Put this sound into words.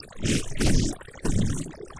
Created with coagula from original and manipulated bmp files. Made from spectrogram of speech.
image, space, spectrogram, synth